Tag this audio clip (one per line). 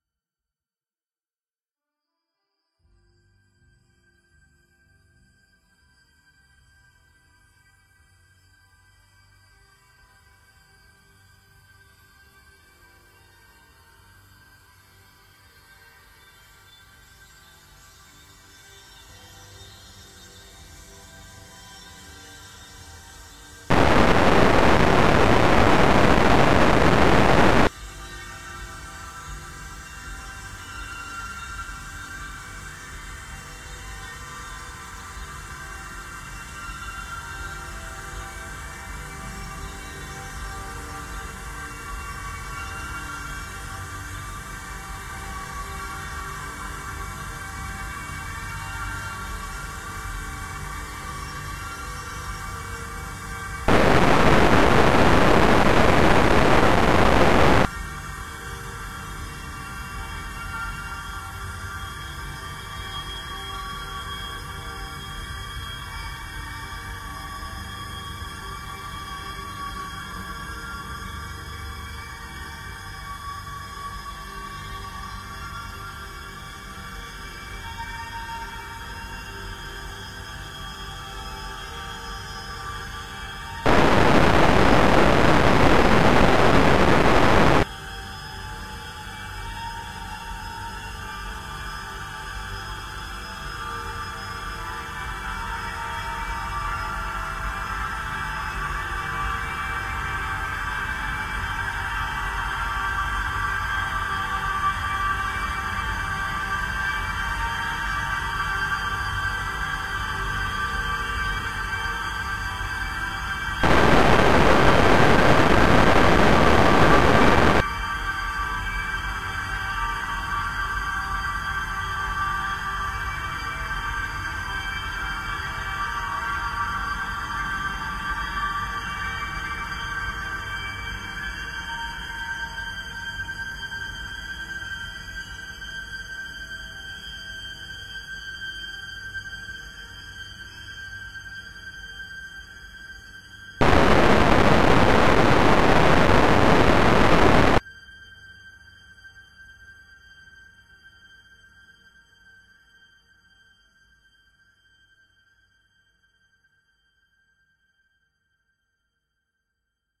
experimental
space
drone
ambient
evolving
multisample
soundscape